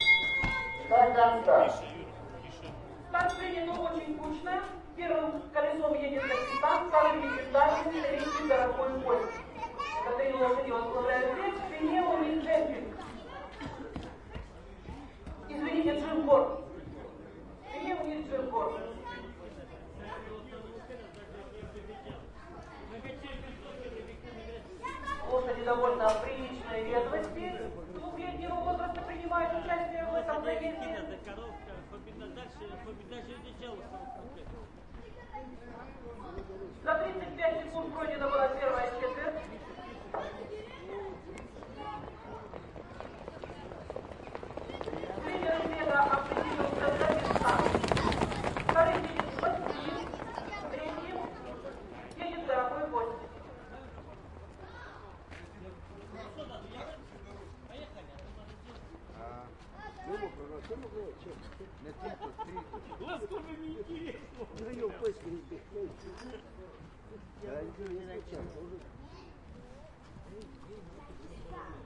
hippodrome race #2 start
Start race #2.
Recorded 2012-09-29 12:30 pm.